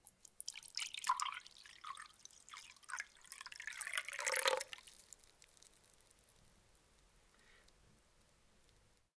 pouring water 5
Water or some other liquid, being poured into a glass. Recorded with Oktava-102 & Behringer UB 1202.
kitchen, drinks, water, glass